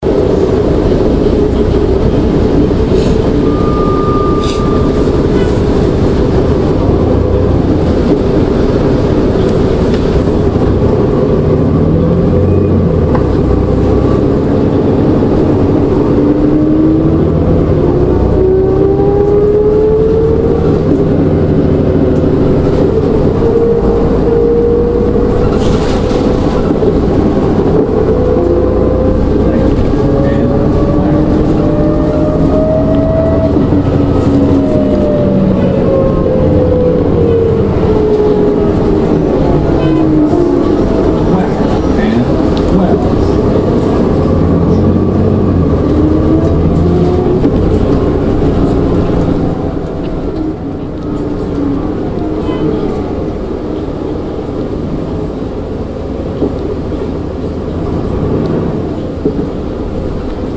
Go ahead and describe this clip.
Riding on the bus while the bus accelerates and rides over bumps, faint sounds of bus automated announcements for each stop (lake and wells),
navy, transit, chicago-transit-authority, requested, transportation, chicago, stop, pier, 124, authority, public, Bus, CTA